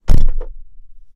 sons cotxe porta dins 2011-10-19
car, sound